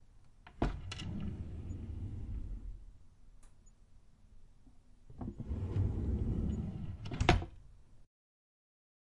Opening Drawer
A drawer being pulled open